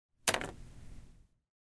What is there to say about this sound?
Pen Drop on Paper
Pen being dropped on Paper